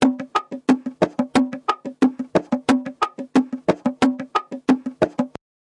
JV bongo loops for ya 3!
Closed micking, small condenser mics and transient modulator (a simple optical compressor he made) to obtain a 'congatronic' flair. Bongotronic for ya!